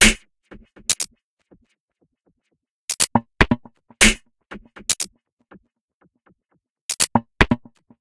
Massive Loop -50
An weird experimental loop with a minimal and minimal touch created with Massive within Reaktor from Native Instruments. Mastered with several plugins within Wavelab.
experimental, loop, minimal, drumloop, 120bpm